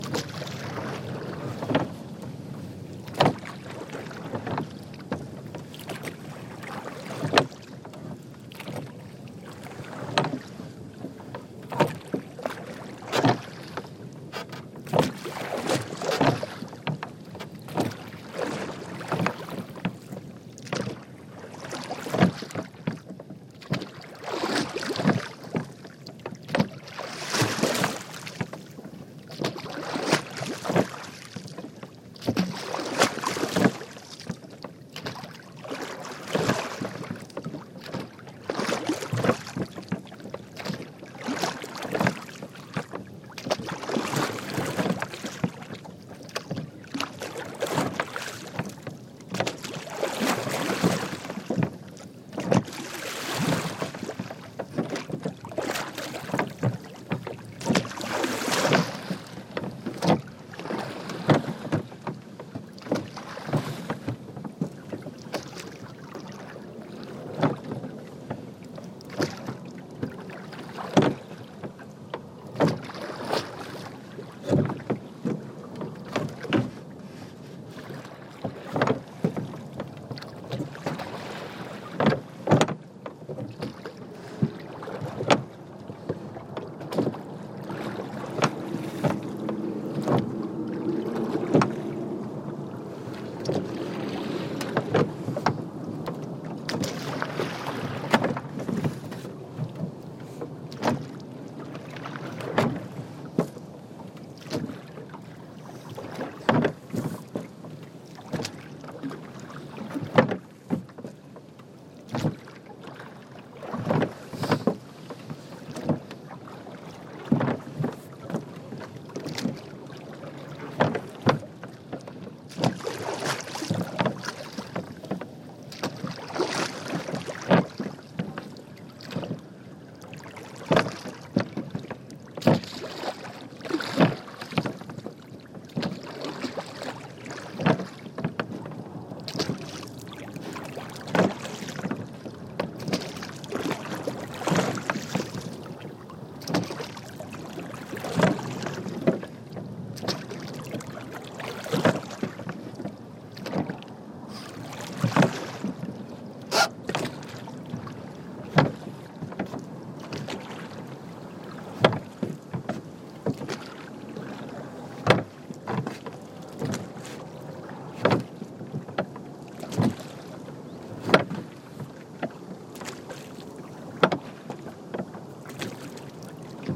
wooden boat row water
boat, row, water, wooden